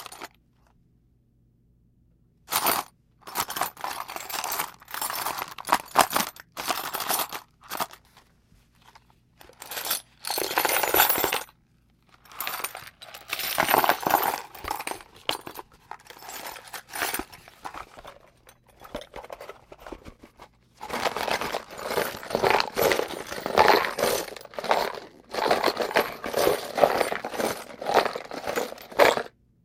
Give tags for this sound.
Creak; Foley; Little; Metal; Screw; Screwdriver; Squeak; Toolbox; Tools

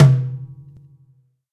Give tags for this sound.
drum; drums; fat; funky; lo-fi; phat; stereo

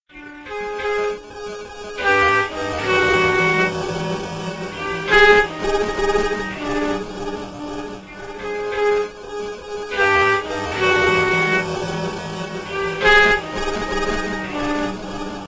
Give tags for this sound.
flute; echoes

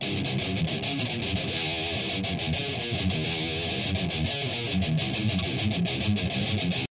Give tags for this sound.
guitar; hardcore; metal; rythem; rythum

